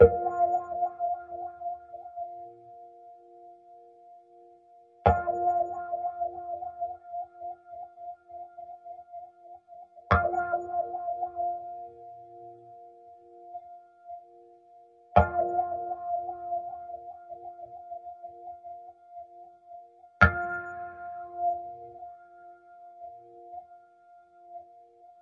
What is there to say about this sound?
Guitar riff edited in "wahwah" effect by Guitar Rig 3